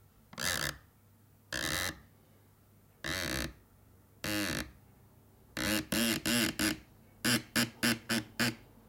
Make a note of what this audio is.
sounds produced rubbing with my finger over a polished surface, my remind of a variety of things
door, groan, grunt, screeching